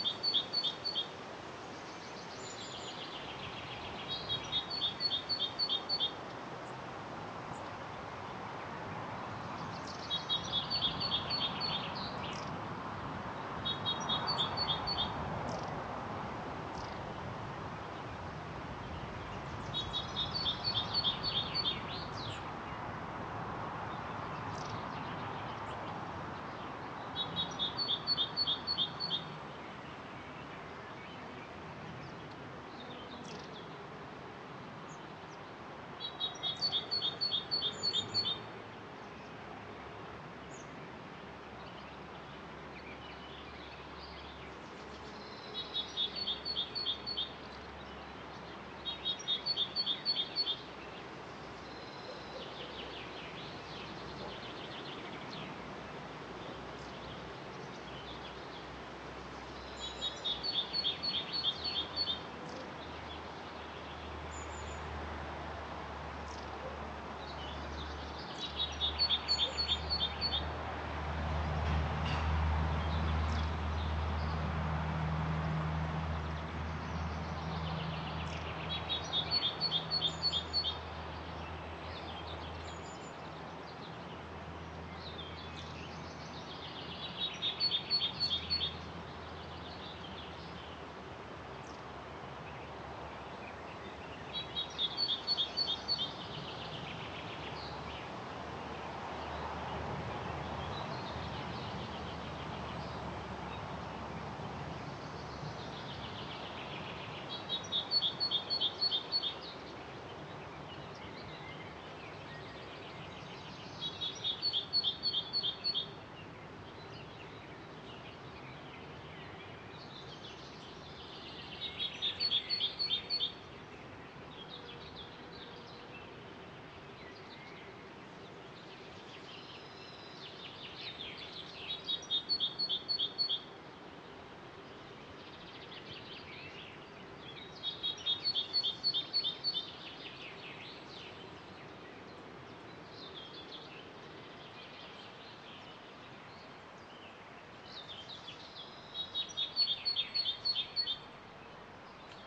180510-bird Sokolec
10.05.2018: around 11.00 a.m. Field recording made in the meadow located in front of the European House of Youth Meetings in Sokolec (Lower Silesia, Poland). Sunny but windy weather, some road noises. No processing, recorder zoom h4n with internal mics.